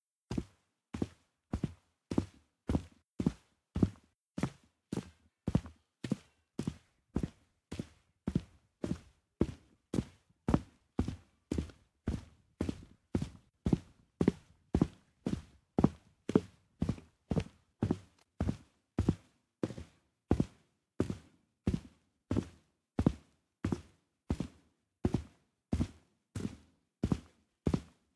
footsteps-wood-bridge-02-walking
bridge, field-recording, footsteps, wood